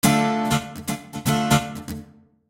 Pure rhythmguitar acid-loop at 120 BPM